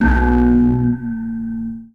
Some Djembe samples distorted